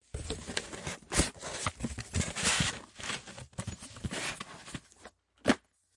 18 Cardboard Box Unfolding Flaps
cardboard, paper, box, foley, moving, scooting, handling,